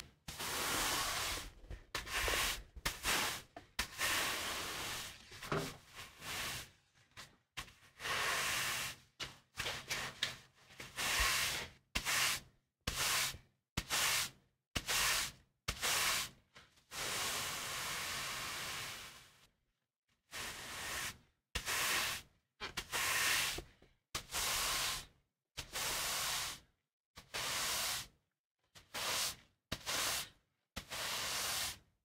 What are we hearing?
Sweeping concrete floor with wide broom. Mono recording from shotgun mic and solid state recorder.
wiper, surface, sweep, broom, besom